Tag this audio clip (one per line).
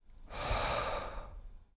breathe
exhale
people